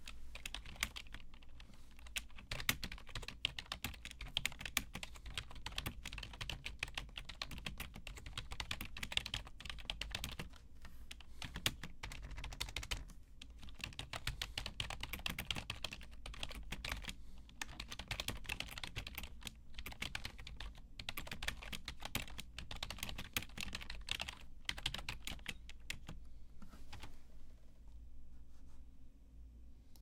Teclado digitar
digitando el el teclado
digitar, Teclado, keyboard